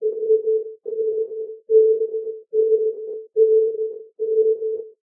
A few octaves in A of a sound created with an image synth program called coagula.